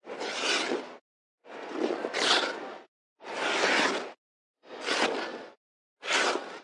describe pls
Skates on Ice: Here is a very specific sound effect. The metallic slash through ice when it is being skated on. There are multiple variations of this in this track due to the amount of contact the blade of the skate has to the ice and also the force being put into the movement. It is a sharp and cold sound. Recorded with the Zoom H6, Rode NTG.
cheer, fun, ice, ice-rink, ice-skating, OWI, skating